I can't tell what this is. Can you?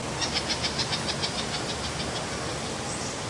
A Wattled Jacana calls as it flies away, with a waterfall in the background. Recorded with a Zoom H2.